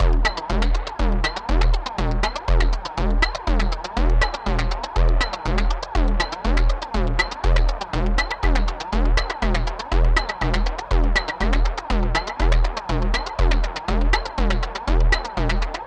Zero Loop 7 - 120bpm
Loop, 120bpm, Zero, Distorted, Percussion